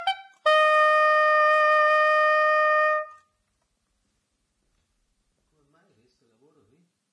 Non-sense sax played like a toy. Recorded mono with dynamic mic over the right hand.